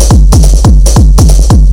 TECHNO POUNDING
trying to make a speedy j type loop. don't add to much distortion to sound add a little then compress heavily.and keep the sounds in layers so some sound is clean keeps its sub and dirty up other bits
techno, loop